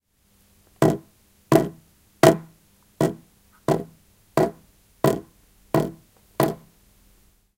mySound WB Fatima
cityrings, wispelberg, fatima, belgium